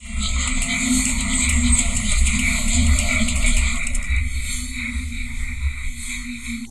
Enigmatic Loop 1
A mix of a different loop percussions played by a percussionist.
Assemblage de plusieurs sons tous differents. Une bonne dizaine de sons empilés...Quelques effets de wah wah flanger et autres pigments sonores.
Bruit, Babil, Alien, Bizarre, Star, brew, Spoc, Speak, Noise, Talk